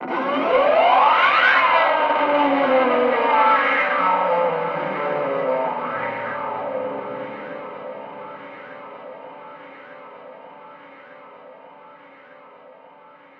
Reverse played Guitar Fx Sound used for a Arrangment. Created with Vita Sampler Power Guitar from Samplitude Music Studio ... Light Delay to get some Length for Ambient.